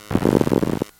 hdd external folder-access short
A short burst of data access on an external 3.5 inch USB hard drive recorded with an induction coil.
hdd, induction-coil